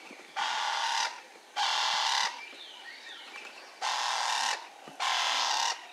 Oiseaux+vautour
A vulture and some birds in Tanzania recorded on DAT (Tascam DAP-1) with a Sennheiser ME66 by G de Courtivron.
africa, bird, tanzania, vulture